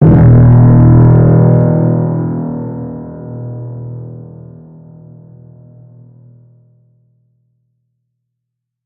Deep Intense Bass Drone
A sound effect I accidently created while working on a project. Suits itself well for large scale scenes in sci-fi and mystery I would assume.
I am curious.
Drone, Dark, Deep, Film, Brahms, Cinematic, Hit, Bass, Inception